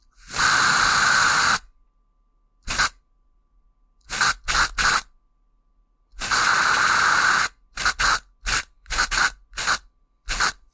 Gas Spray
gas, spray, spraying